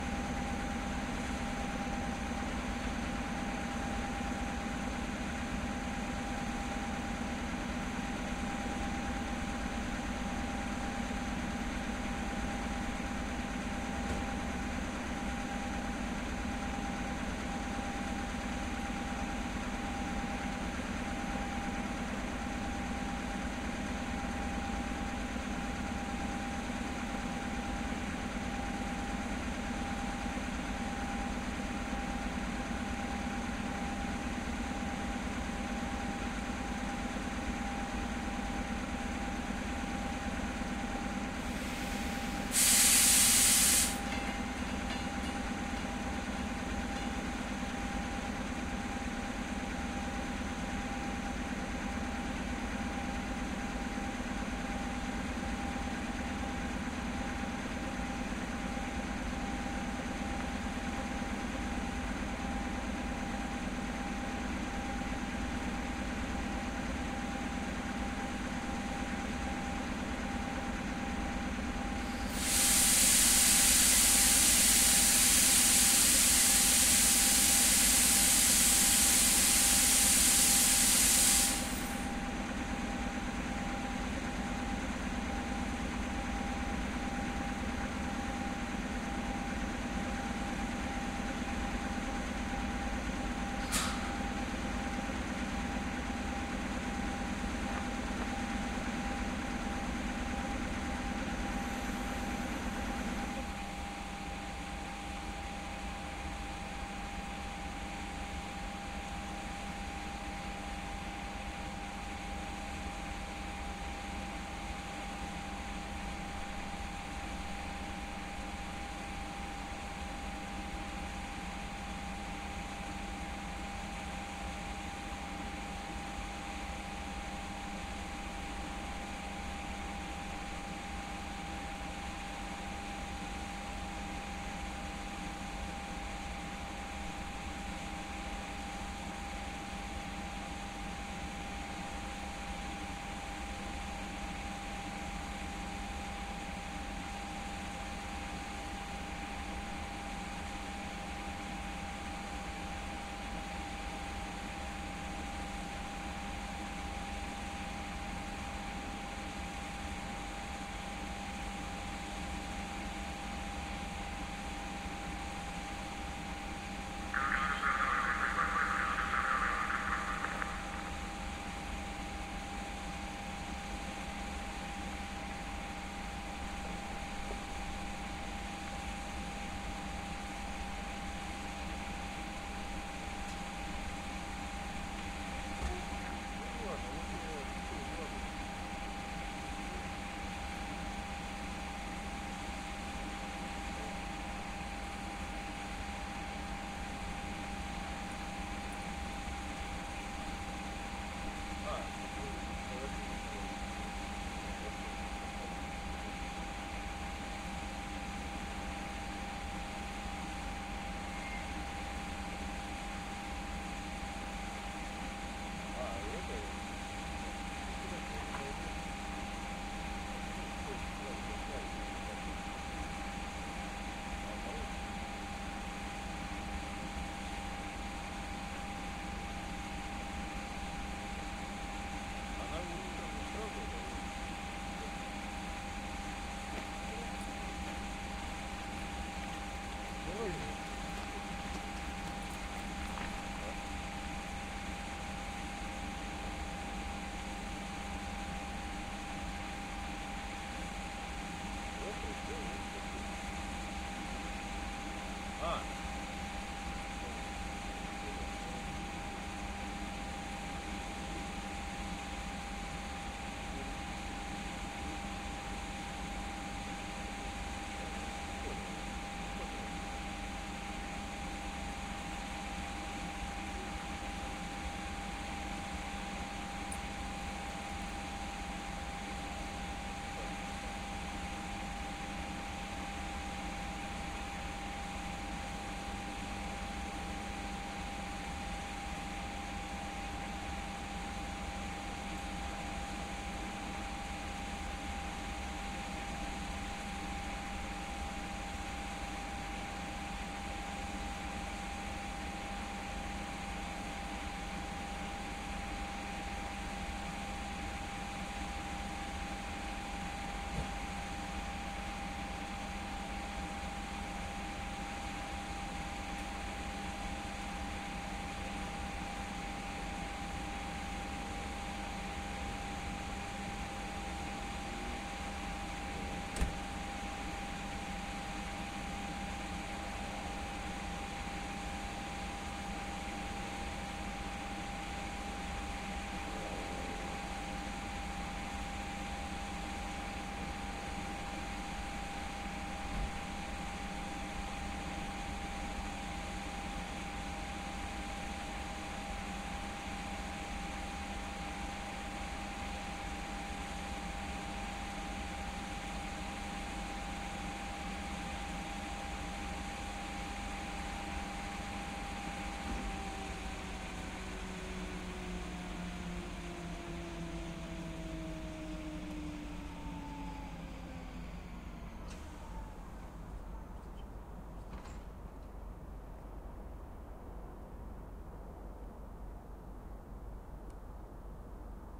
Railway tracks near the station at night. Dispatchers' negotiations. Passage of a freight train. Passage of a diesel locomotive.
Recorder: Tascam DR-40
Place: Omsk railway station.
Recorded at 2014-05-02.